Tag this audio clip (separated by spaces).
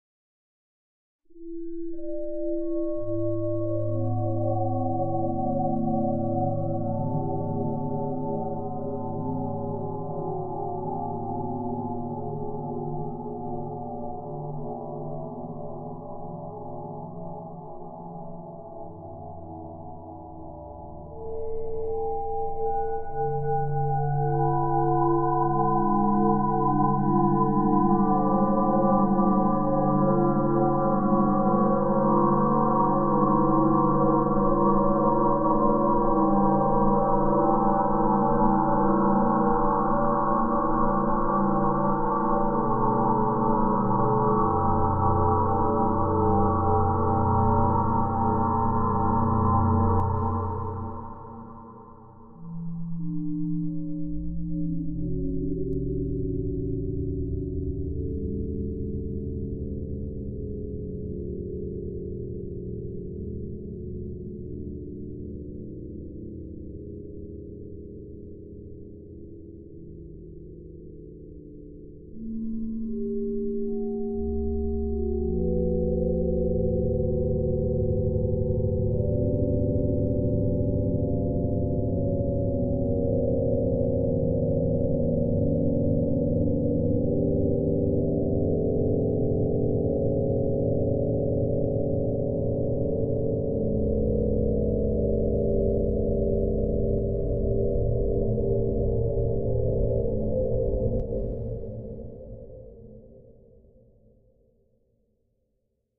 soundscape sampled bell synth electronic chime glockenspiel